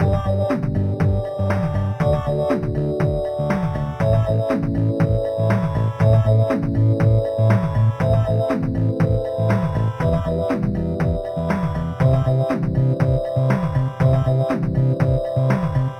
8 bit game loop 006 simple mix 1 short 120 bpm
120, bass, bit, drum, free, josepres, loops, synth